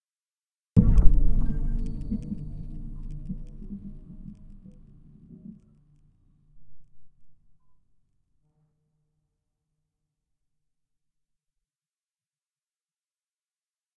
the mother load
lowercase minimalism quiet sounds
lowercase, minimalism, quiet, sounds